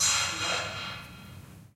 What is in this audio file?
"808" drum sounds played through an Orange Amplifiers "Micro Crush" miniamp recorded for stereo ambiance in the original Batcave. These work well as drum layers under more conventional sounds, and in other creative ways. Recording assisted by Steve and Mikro.